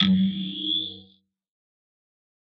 Digi hangman
slow revered pad
digital, fx